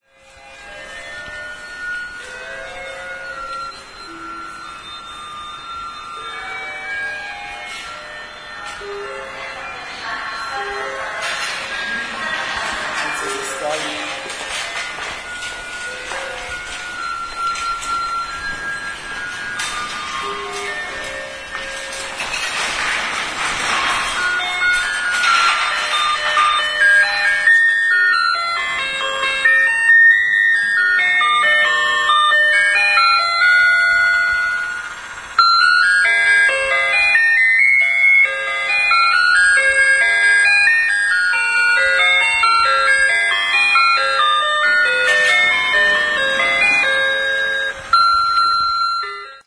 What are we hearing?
20.11.09: about 21.00. The entrance to Leroy Merlin shopping mall (Poznań Komorniki commercial center). The Santa Claus toy is playing the popular (popcultural) Christmas melody. In the background the sound of sliding doors.